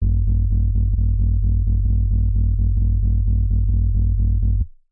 spaceship woob woob 01
A spaceship motor sound, woob-woob, created with FL